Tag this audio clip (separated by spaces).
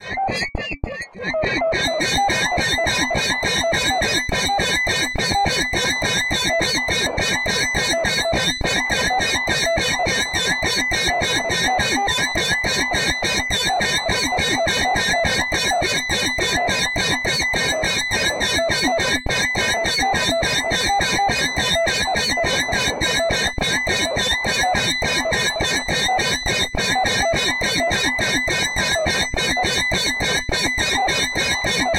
alien animal animals creature critter space synth synthesized